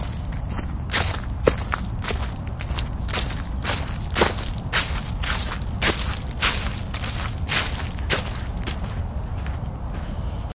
fl excuse leavesinfall
Walking through the leaves while recording birds during early morning walk through a nature trail with the Olympus DS-40/Sony Mic.
bird, birdsong, animal, song, walking, field-recording